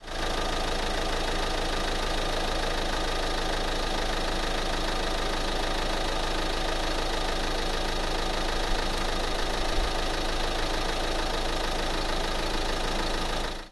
Car Engine, Exterior, A
Raw exterior audio of the engine ambience from a Renault Grand Scenic.
An example of how you might credit is by putting this in the description/credits:
The sound was recorded using a "H1 Zoom V2 recorder" on 18th April 2016.
Car, Exterior, Vehicle, Ambience, Engine